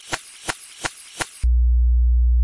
DS F1 Pro 4
resample several cars passding by + surprise
sample, short, sound